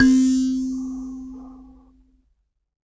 a sanza (or kalimba) multisampled with tiny metallic pieces that produce buzzs